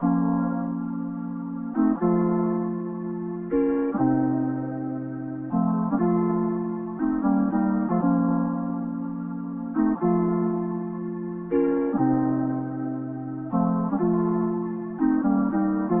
synth keys made in logics alchemy pack